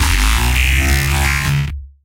DIA S15 Warped Bass - B (26)
Cutted parts of an audio experiment using Carbon Electra Saws with some internal pitch envelope going on, going into trash 2, going into eq modulation, going into manipulator (formant & pitch shift, a bit fm modulation on a shifting frequency at times), going into ott
Carbon-Electra, Distortion, EQ-Modulation, FM, Formant-Shift, Freestyle, Frequency-Modulation, Frequency-Shift, Manipulator, Pitched, Pitch-Shift, Sound-Design, Trash2